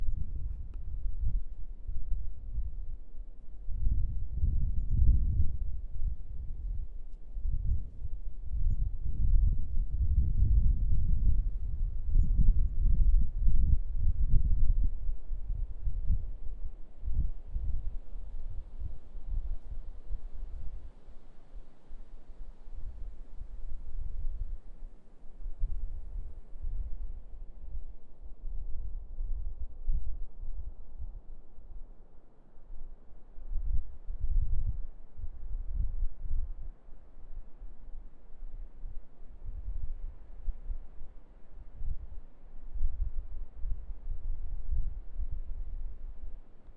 Various collection of sounds taken on vacation in Seaside, WA mostly sounds of ocean, water, and some other treats.